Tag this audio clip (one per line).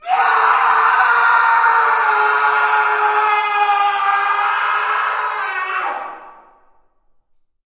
agony
bronius
cry
dungeon
fear
human
jorick
male
pain
reverb
schrill
screak
scream
screech
shriek
squall
squeal
torment
yell